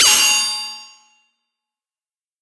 Attack blocked!
This sound can for example be used in animes, games - you name it!
If you enjoyed the sound, please STAR, COMMENT, SPREAD THE WORD!🗣 It really helps!
/MATRIXXX
if one of my sounds helped your project, a comment means a lot 💙